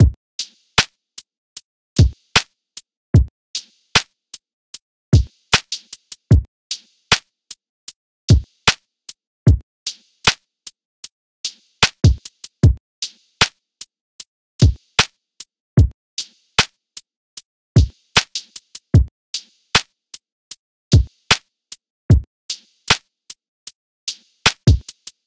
76 BPM LOFI DRUM(2) LOOP
BPM, chill, hiphop, lofi, drums, 76, Loop, Loops, pack, drum, samples, sample, music